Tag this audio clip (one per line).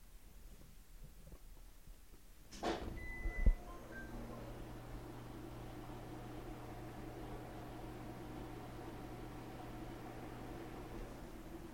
alive
black-out
civilization
comfort
digital
dwelling
home
house-hold
household
modern-age
noises
power-outage
rebirth
returned
salvation
urban